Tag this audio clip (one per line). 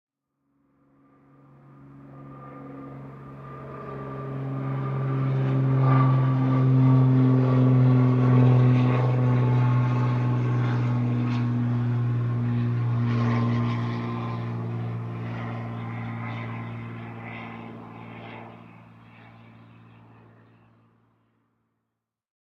aviation
aeroplane
plane